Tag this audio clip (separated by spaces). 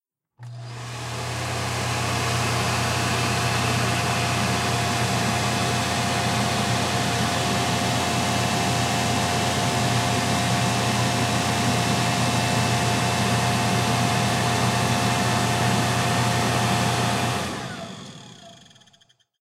Electric Machine